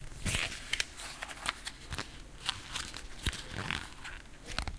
013 paper crumple
You can hear a peace of paper being crumpled by hands.
This sound was recorded using a Zoom H4 recording device at the UPF campus in a corridor from tallers in Barcelona.
We added a fade in and out effect.